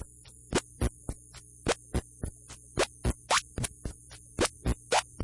Squealing whistle rhythm from a circuit bent tape recorder.